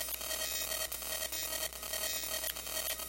vtech circuit bend046
Produce by overdriving, short circuiting, bending and just messing up a v-tech speak and spell typed unit. Very fun easy to mangle with some really interesting results.
broken-toy, circuit-bending, digital, micro, music, noise, speak-and-spell